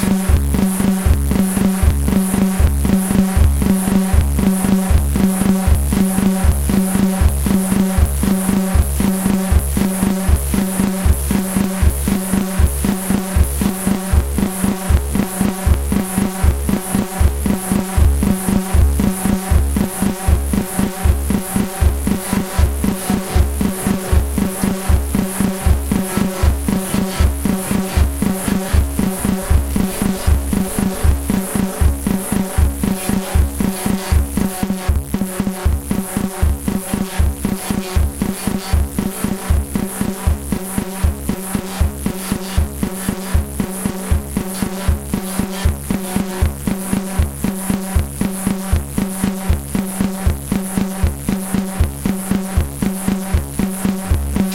working in the factory
industrial, monotonous, noise